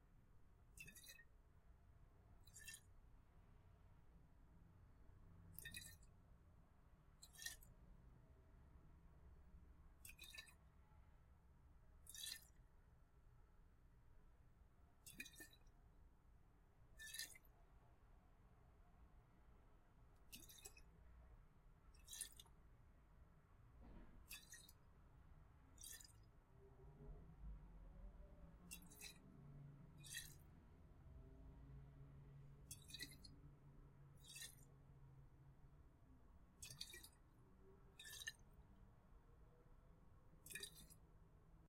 Water swishing through glass